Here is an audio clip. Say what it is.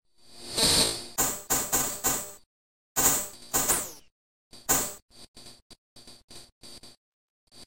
A sequence I made with Csound. The goal was to make a hi-hat. There is extreme panning in the sequence and different central frequencies for each of these drum-like sounds.
csound, electronic-drums, hihat